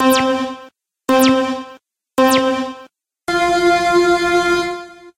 race countdown1
No need to mention me.
Simple race semaphore countdown.
This sound was made with Audacity Software, using a base sound and aplying some filters to it.
car, carreras, cars, coches, contador, countdown, counter, green, light, luces, luz, preparado, race, ready, red, roja, rojo, semaforo, semaphore, threetwoone, tresdosuno, verde